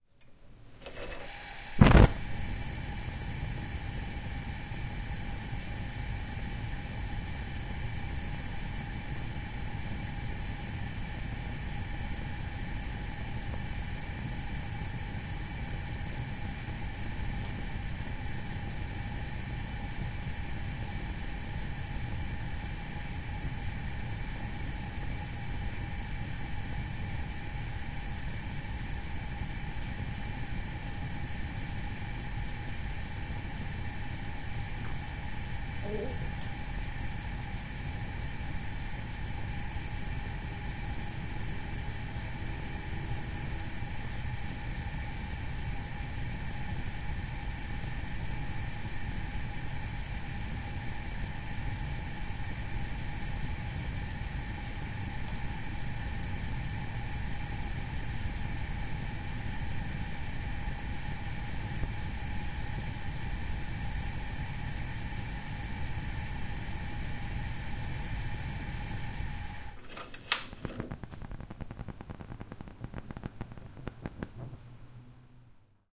gas stove burner light, burn, and switch off close
close, burn, light, switch, gas, burner, stove, off